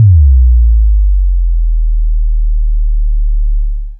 This is a simple but nice Bass-Drop. I hope, you like it and find it useful.
bass, bass-drop, bassdrop, deep, drop, frequency, low, low-frequency, sample, sine